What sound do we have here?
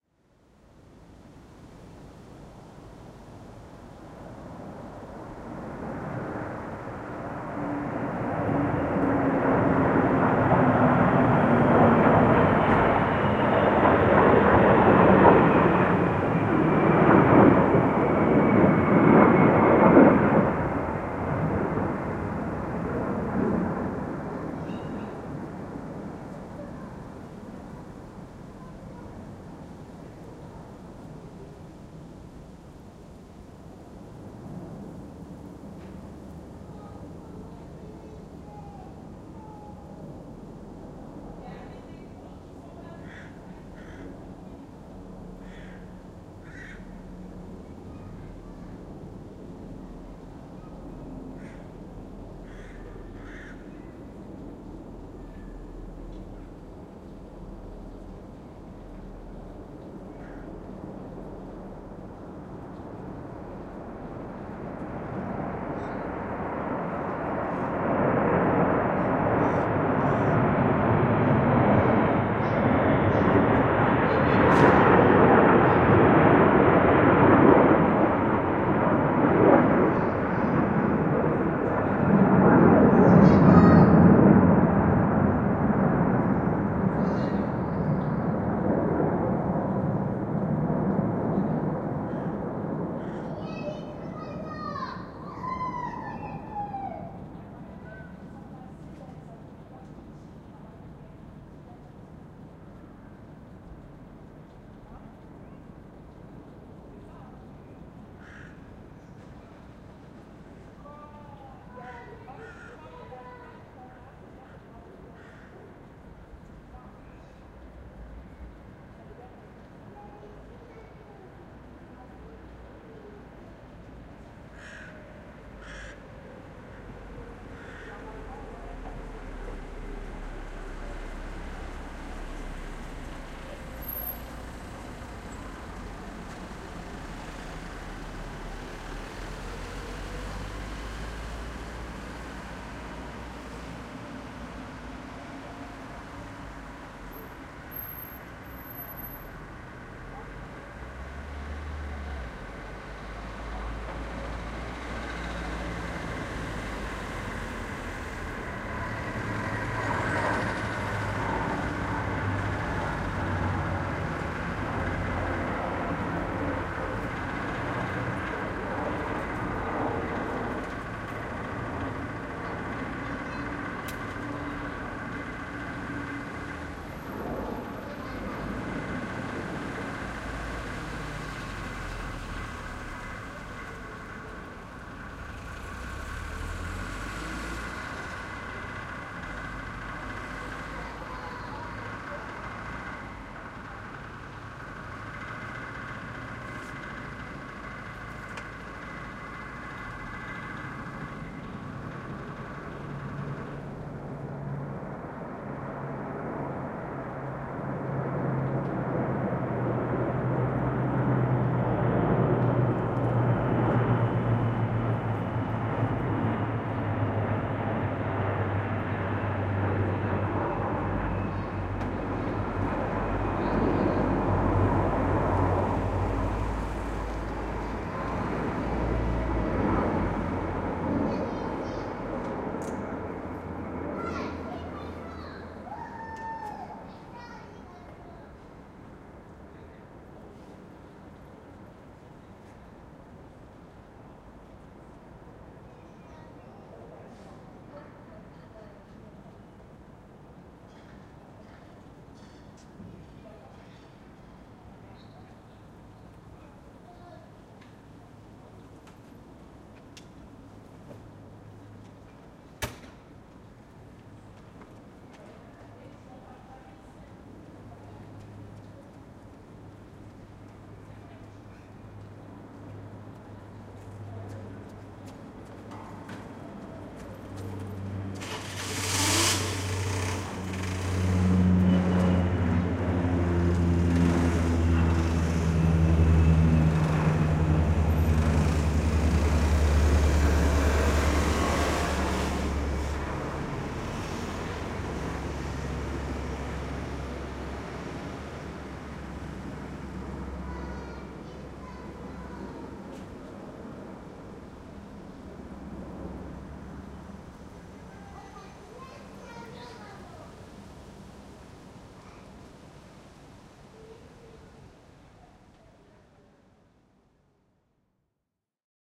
200714 1223-2 FR JetFighters
Air force parade during French National Day. (airplanes, 2nd file)
I made this recording on July 14th (which is the French National Day), from my balcony, located in the suburbs of Paris (France)
One can hear jet fighters flying over the silent town, some cars passing by in the street, voices of some people watching the show, and some rain drops flying in the air.
Recorded in July 2020 with an Olympus LS-P4 and a Rode Stereo videomic X (SVMX).
Fade in/out applied in Audacity.
air-force, street, pilot, jet, parade, airplanes, France, aircraft, soldiers, fighters, city, field-recording, noise, town, military, flight, airplane, army, atmosphere, soundscape, show, plane, ambience, fighter, fly, national-day, aircrafts, planes, suburbs, Paris